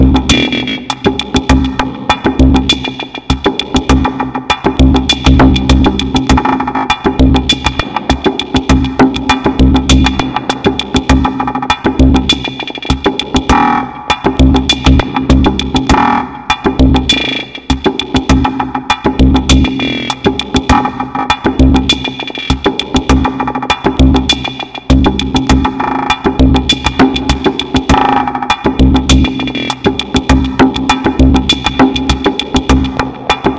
Woodpecker Loop 100 bpm
Percussion loop, done in Ableton Live.
loop, percussion